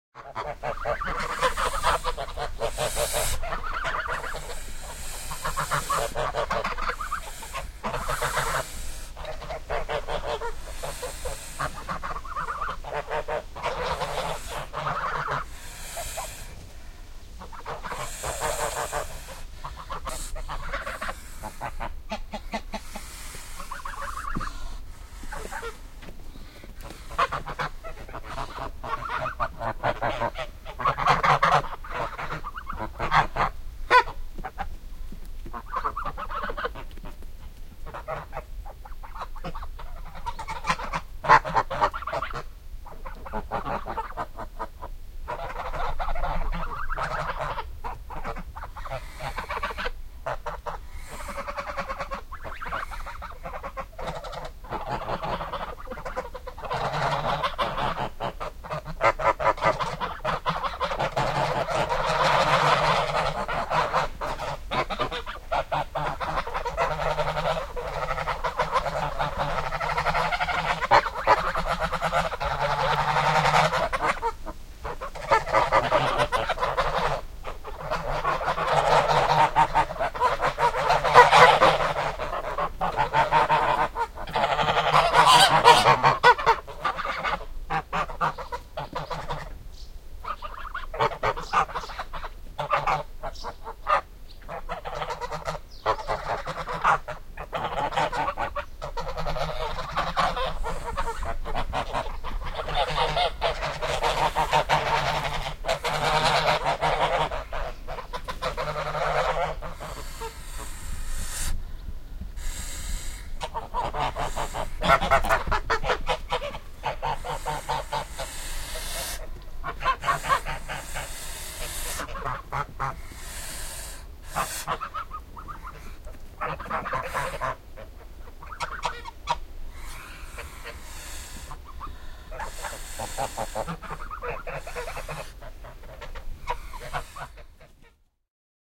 Hanhet kaakattavat / Geese honking
Pieni parvi hanhia kaakattaa ja sähisee vilkkaasti.
Paikka/Place: Suomi / Finland / Siuntio
Aika/Date: 10.08.1995